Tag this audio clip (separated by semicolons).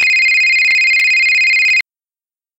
call; phone; sound